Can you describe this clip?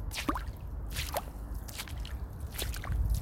Water Footsteps
Recorded using a zoom h2n recorder. Footsteps walking through water. Edited in audacity.
walk, water